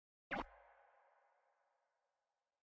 bubble gun shot/noise
bubbles, gun, gunshot, noise, shot